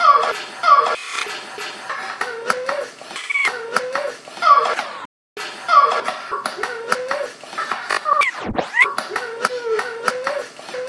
- REC 190bpm 2020-08-23 02.24.09
amen, beats, breaks, drum